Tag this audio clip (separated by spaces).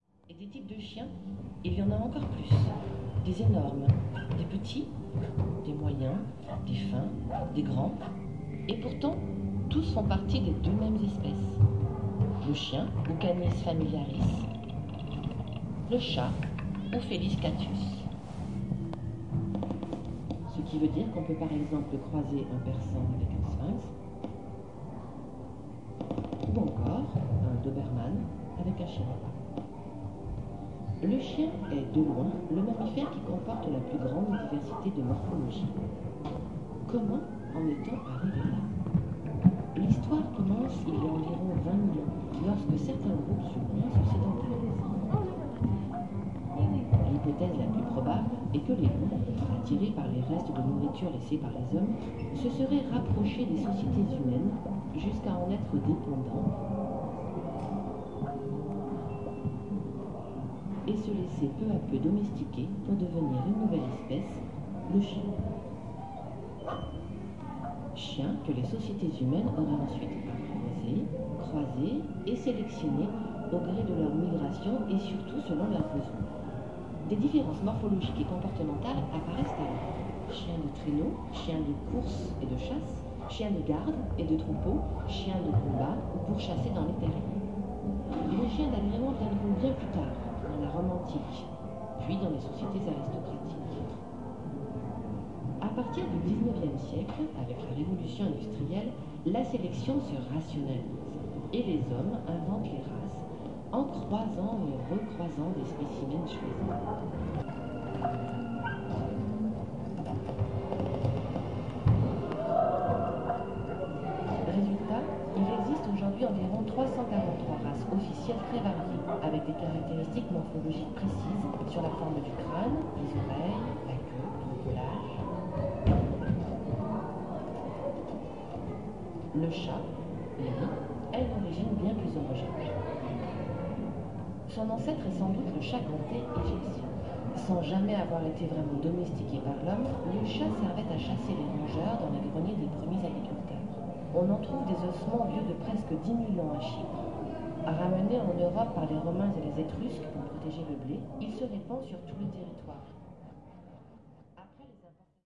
exhibition Quebec people voices museum ambience tourism field-recording audio Canada